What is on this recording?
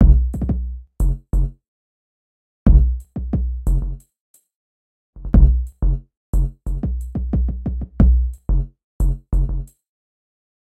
Ambient Groove 013
Produced for ambient music and world beats. Perfect for a foundation beat.
ambient, drum, groove, loops